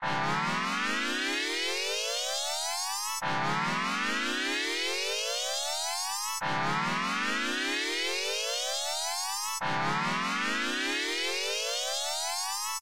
WARP sound
Warp Type sound made with beepbox,